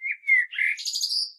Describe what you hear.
Turdus merula 23
Morning song of a common blackbird, one bird, one recording, with a H4, denoising with Audacity.
bird, blackbird, field-recording, nature